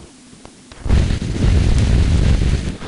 sample exwe 0188 cv fm lstm 1024 2L 01 lm lstm epoch10.71 1.9120 tr
generated by char-rnn (original karpathy), random samples during all training phases for datasets drinksonus, exwe, arglaaa
recurrent, char-rnn, generative, neural, network